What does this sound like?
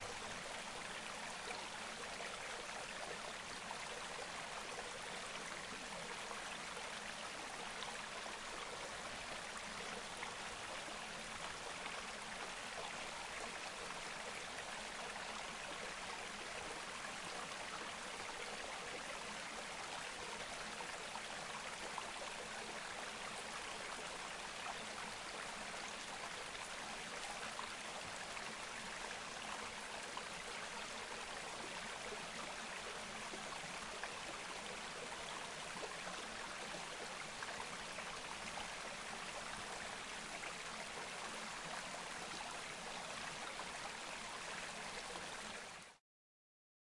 At the National Park of Germany. Normalized +6db.